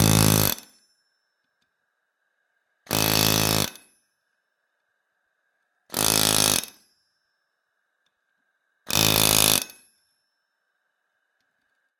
Pneumatic chisel hammer - Atlas Copco rrc 22f - Forging 4
Atlas copco rrc 22f pneumatic chisel hammer used to force hot steel four times.